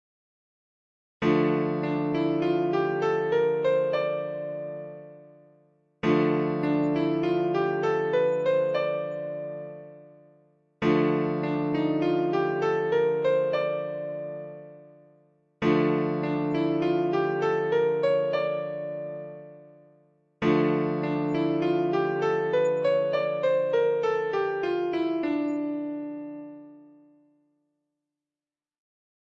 modes scales dm
Dm chord played with ascending minor modes (Aeolian, Dorian and Phrygian), ascending harmonic minor scale, ascending and descending melodic minor scale.
minor, modes, scales, d